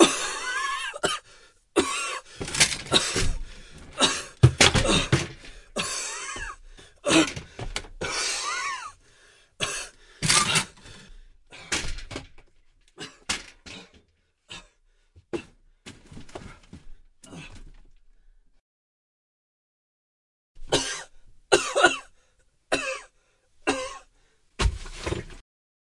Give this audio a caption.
cough and banging on things